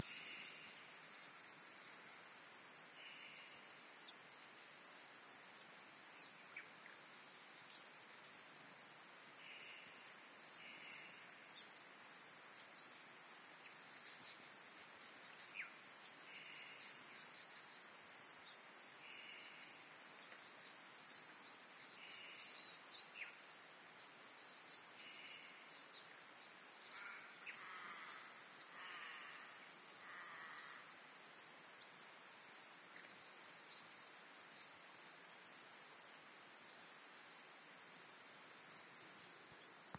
Flock of birds rivulet
Recording of a flock of birds on the Hobart Rivulet track, South Hobart, Tasmania, Australia
field-recording, birds